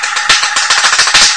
Short jack-hammer percussive playing of a handmade sistrum
Recorded at 22khz
percussion, processed, shake, sistrum